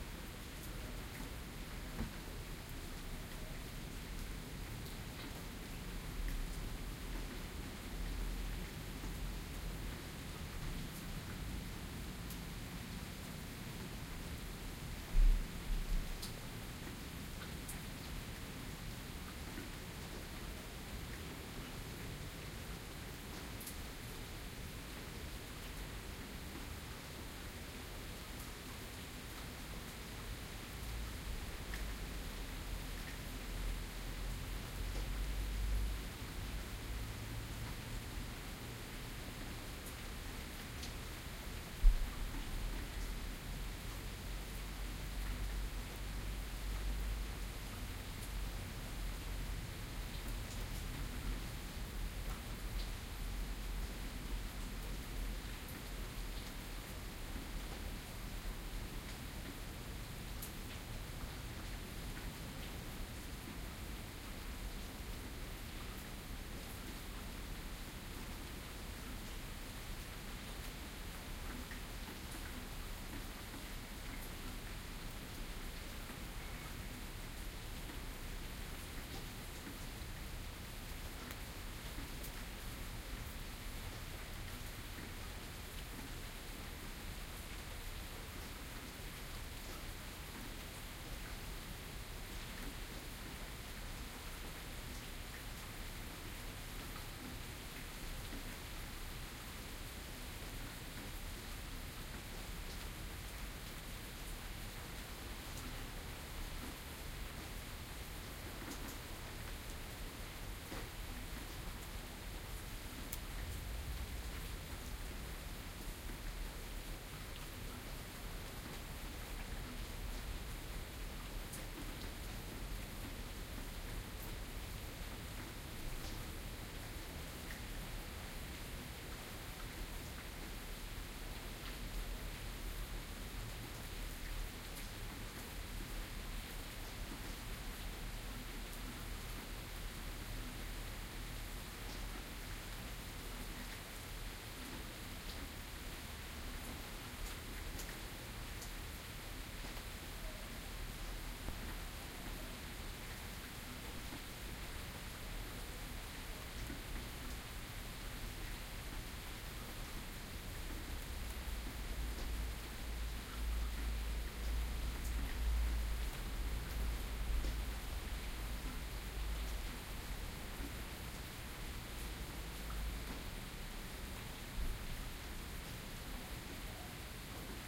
A short track of some rain, recorded with the Soundman OKM II binaural microphones.
binaural, rain